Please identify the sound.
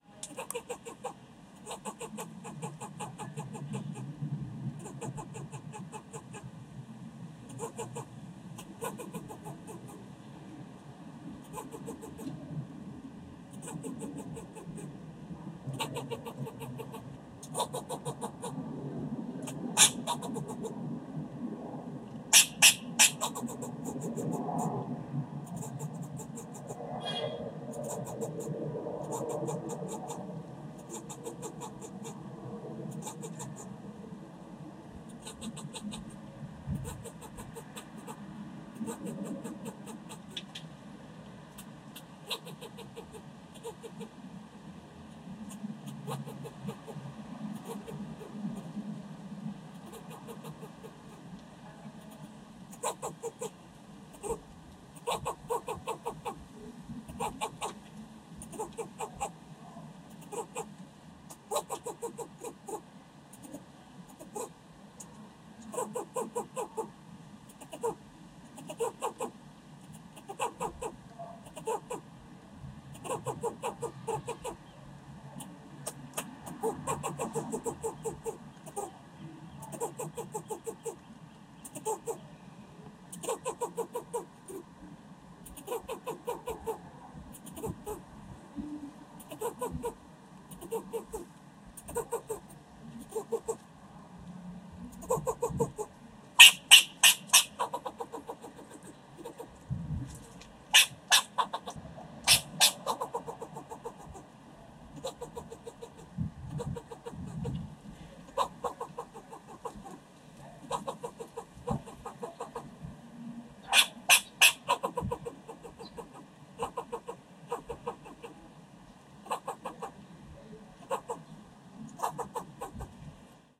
Barking Squirrel in the City
A very chatty squirrel in my backyard (apartment building backyard, surrounded by other buildings, cement ground, nearby street) in Los Angeles is trying to warn me and my cat to stay away from him.
He chirps, barks, shakes his tail at us and about half way through the recording does some angry threatening barks (after I dropped something... I cut that out).